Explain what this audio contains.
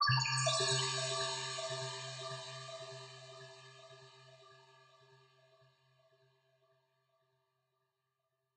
Result of a Tone2 Firebird session with several Reverbs.
ambient, atmosphere